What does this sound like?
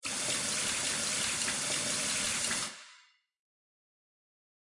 Fountain, mall, indoors water flowing
The sound of a fountain in a mall.
fountain, mall, water